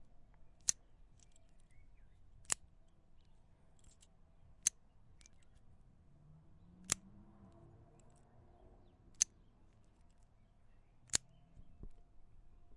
OWI Padlock
Unlocking a padlock with a key.
key, turning-key, padlock, unlock